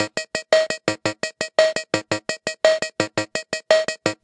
pss-130 rhythm slow rock
A loop of the slow rock rhythm from a Yamaha PSS-130 toy keyboard. Recorded at default tempo with a CAD GXL1200 condenser mic.